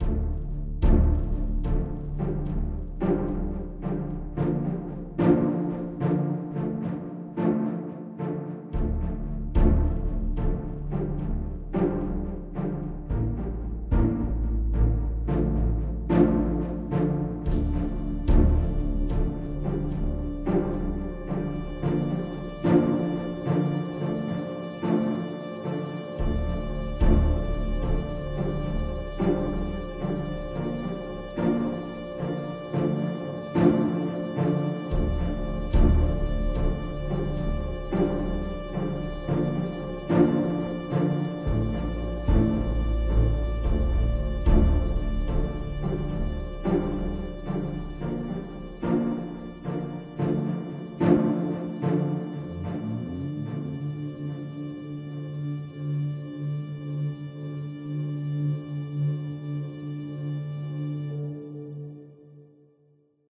Tension orchestra chords.
synths:ableton live,kontakt.
contrabass, loops, orchestral, instruments, orchestra, strings, soundtrack, music, classic, chords, original, Tension, ensemble, bowing